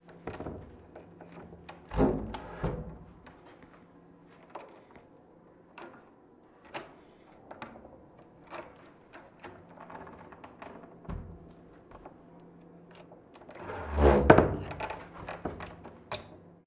Near Door 1 (Slow)
Sound of a wooden door open and close from a close distance. Slow version.
close, closing, creak, door, near, open, slow, wood